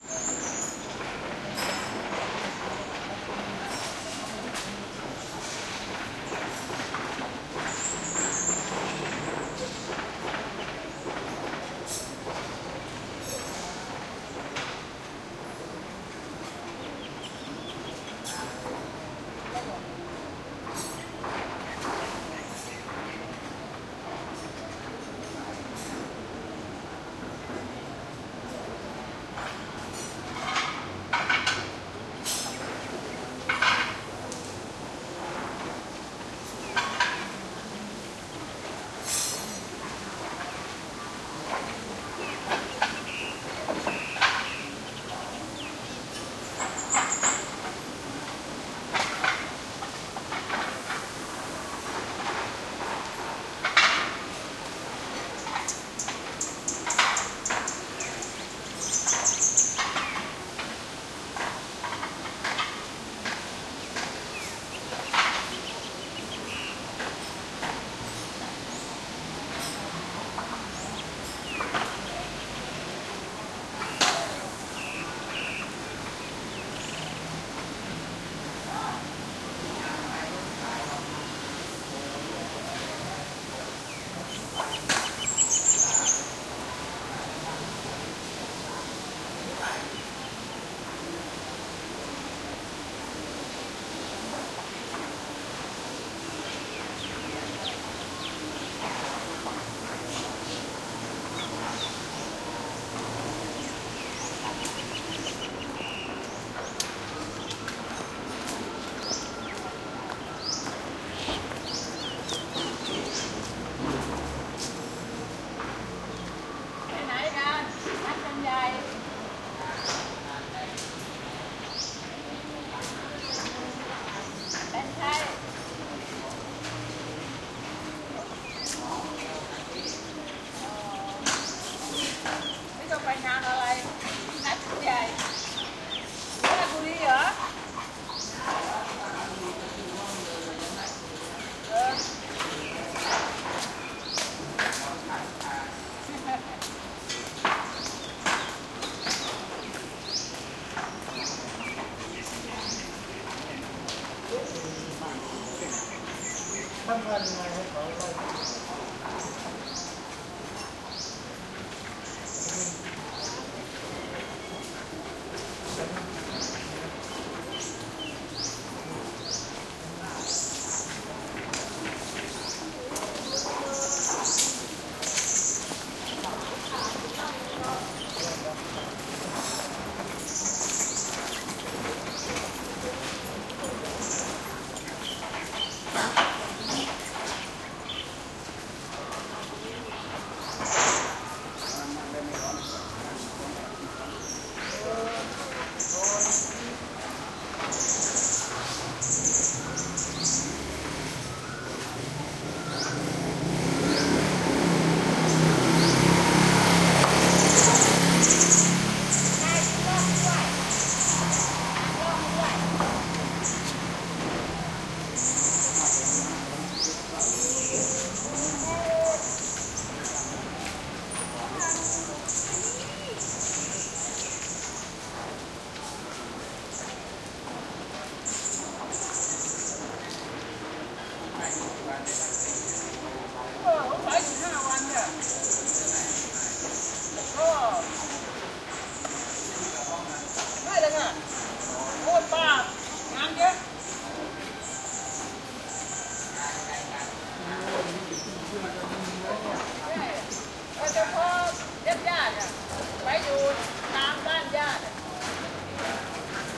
Thailand Bangkok, Grand Palace courtyard scaffolding construction, birds, voices, cooking sounds